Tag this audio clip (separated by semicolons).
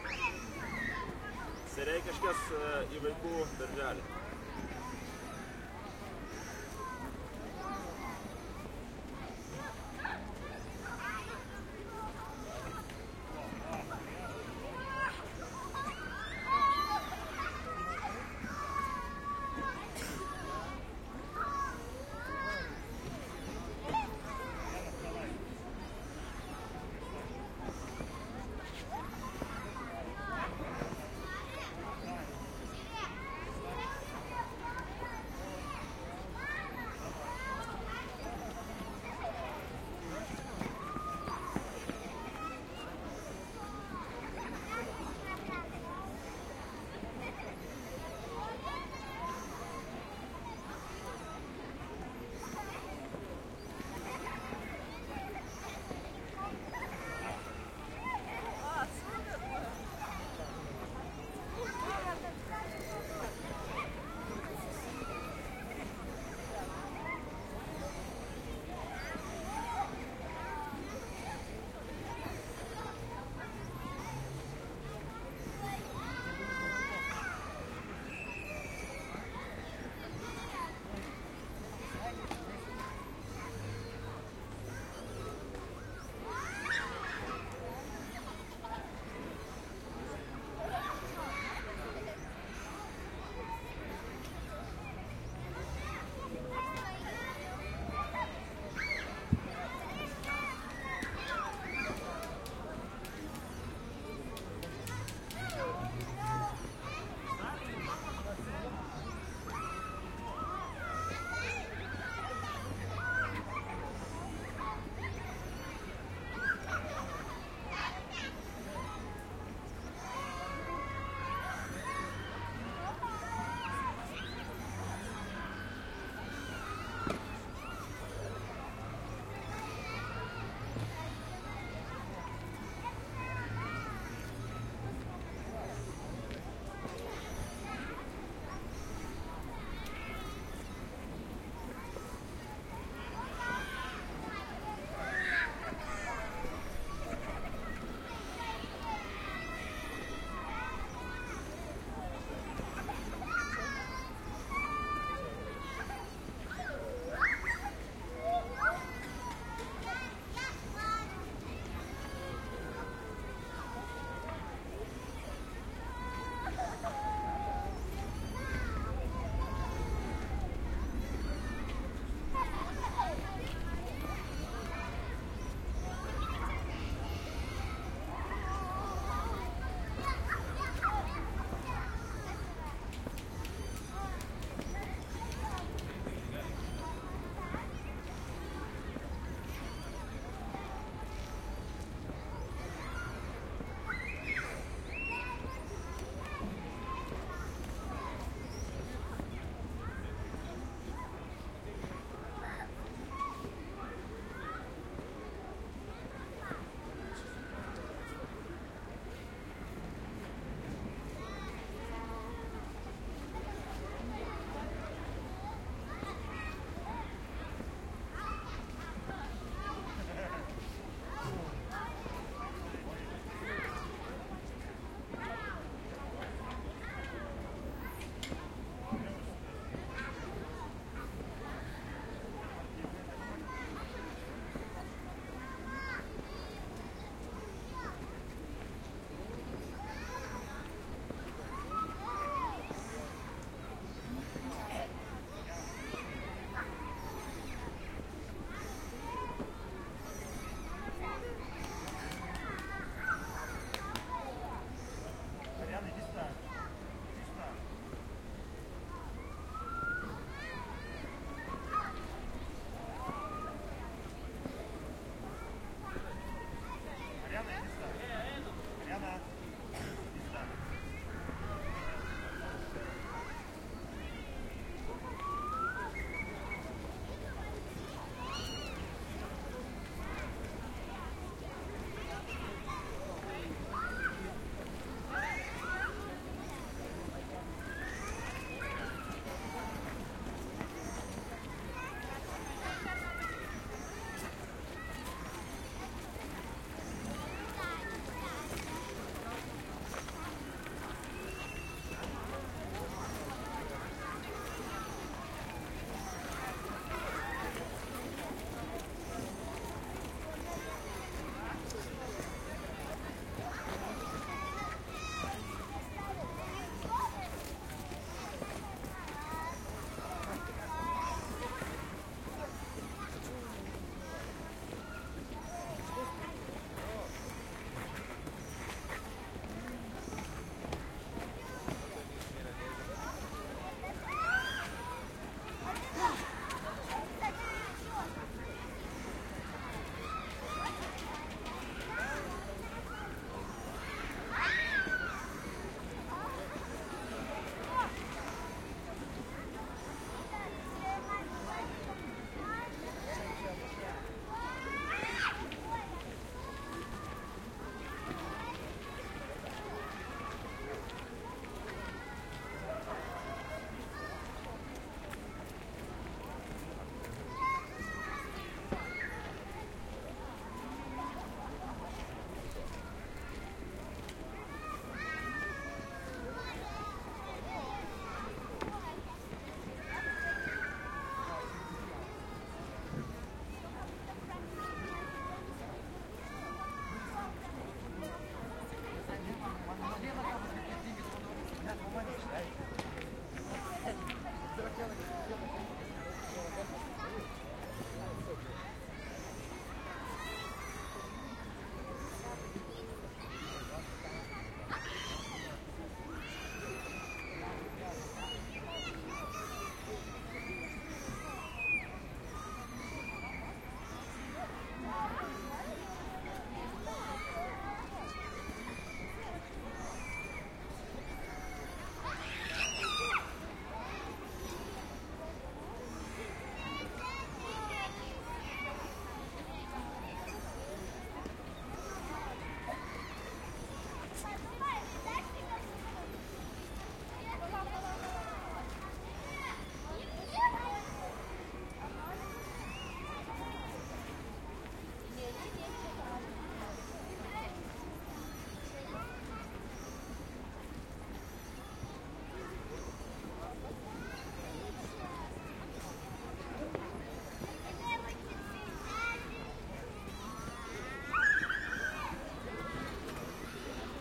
soundscape; Vilnius; atmosphere; spring; Early; ambience; chldren; City; atmo; Park; playing; ambient; atmos